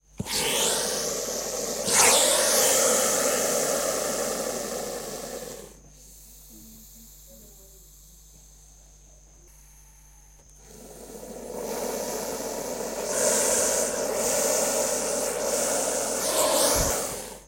A Boa Constrictor warning us not to get any closer. Recorded in Costa Rica 1994. Field recording
Equipment: Sony TC-D5M cassette-recorder with a Sony ECM-929LT microphone